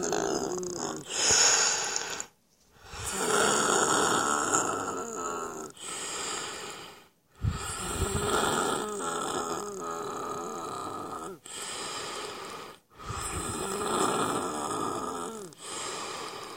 The sound of breathing with bronchitis
bronchitis, darth, breathing, vader